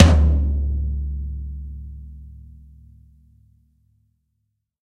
SRBD RTOM3 001
Drum kit tom-toms sampled and processed. Source was captured with Electrovice RE-20 through Millennia Media HV-3D preamp and Drawmer compression. These SRBD toms are heavily squashed and mixed with samples to give more harmonic movement to the sound.
tom, sample, drum, kit, toms, drums, real